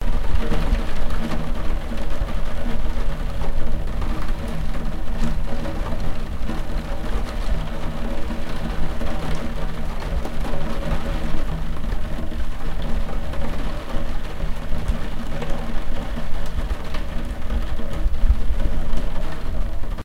rain against window
Intense rain drops against window pane recorded with a Zoom H1 XY-microphone. Perfect as the sound of raindrops falling against the front shield of a car.
But you don't have to.
Wanna see my works?
car, drops, extreme, front-shield, glass, intense, pane, plash, rain, raindrops, raining, ripple, shower, water, weather, window, windshield